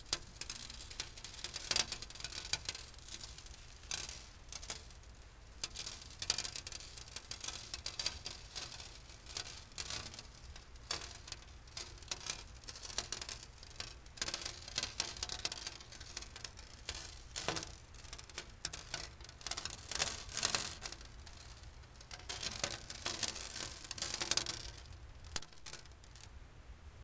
sand pour on glass FF673
sand, sand pour on glass, glass
glass, pour, sand